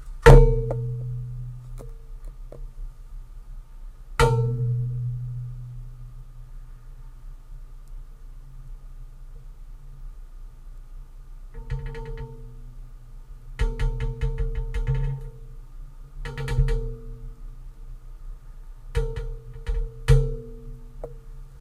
office insidewatercooler
Sounds of a small office recorded with Olympus DS-40 with Sony ECMDS70P. Monophonic recording from inside an empty plstic water jug.
office, field-recording